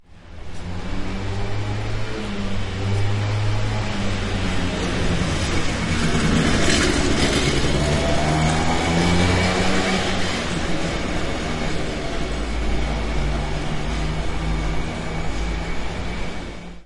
20101230 passing.motorcycle
a motorcycle passing. Soundman OKM mics into Sony PCM M10